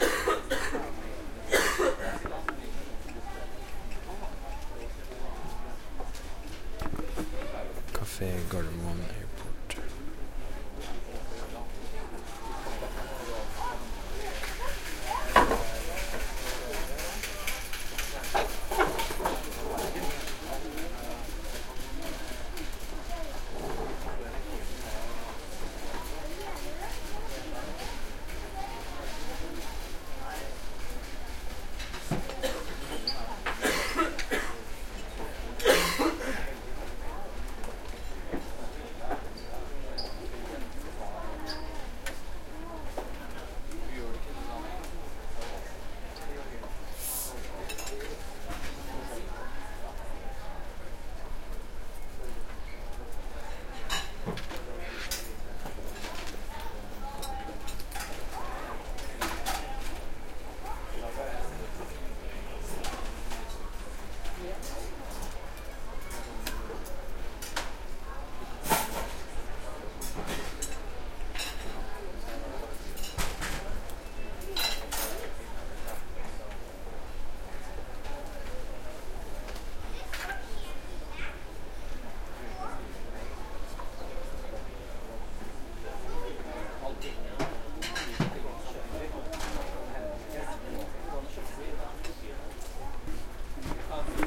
Restaurant montpellier
This recording is done with the roalnd R-26 on a trip to Montpellier france chirstmas 2013.
cafe, canteen, chatter, chatting, crowd, people, restaurant, talking